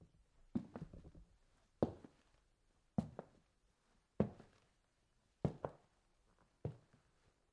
slow-walk-on-wooden-floor
a couple of footsteps, should have plenty of distance between each step
Stay awesome guys!
foot, foot-steps, moving-across-floor, stepping, steps, walking, wooden-floor